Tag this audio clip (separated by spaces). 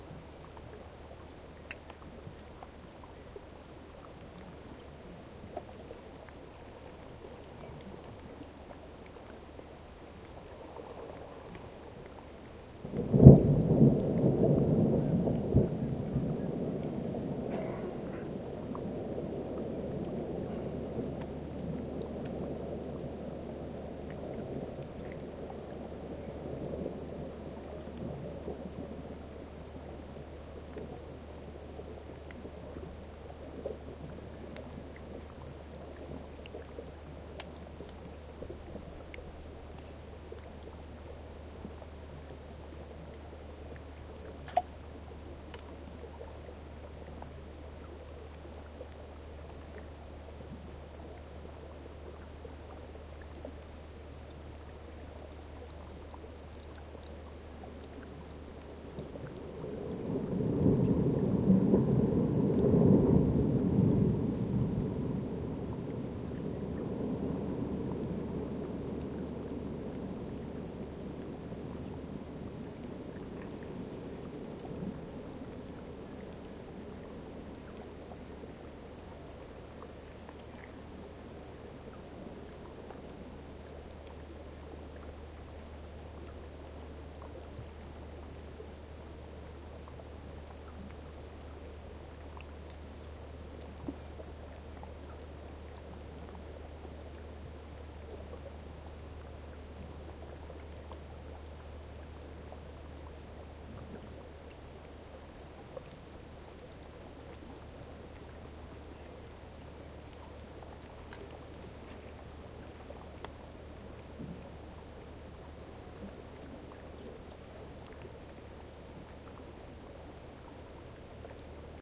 brash calving glacier ice